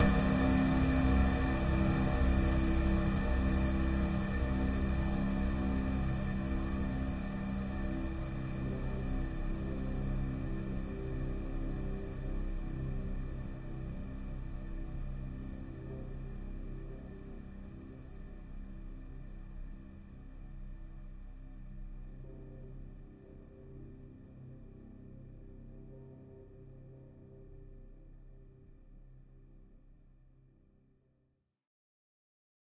Industrial: Machine clank, noise. Loud bang, machine, machine gear, mechanics, reverb, large room, scary. These sounds were sampled, recorded and mastered through the digital audio workstation (DAW), ‘Logic Pro X’. This pack is a collection of ambient sounds stylised on an industrial soundscape. Sampling equipment is a ‘HTC Desire’ (phone).